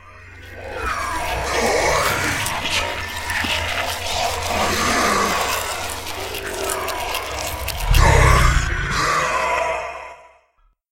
6 separate tracks of my voice/breathing with pitch bend, delay, reversed, inverted, phased, and eating potato chips for extra effect! Noise Reduction used. Recorded at home on Conexant Smart Audio and AT2020 USB mic, processed with Audacity.
voices, nightmare, scary, evil, haunted, horror, spooky, dark, deep